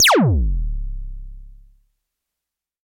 EH CRASH DRUM58
electro harmonix crash drum
crash, drum, electro, harmonix